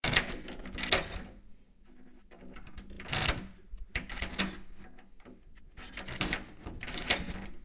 locking door
Locking of a door in my own house. It's an wooden door with a an old key. Recorded with zoom.
key, lock